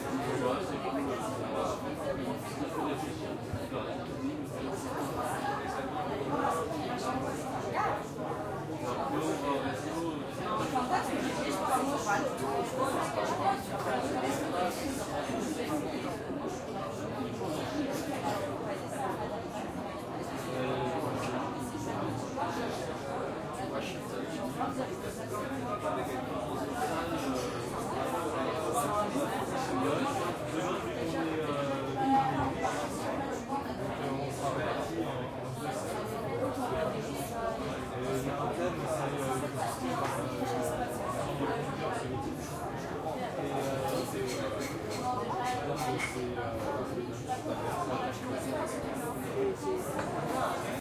Ambiance Bar People Loop Stereo
Ambiance (loop) of a bar in a city.
Gears: Tascam DR-05
ambiance, atmosphere, background, bar, city, field-recording, loop, people, talking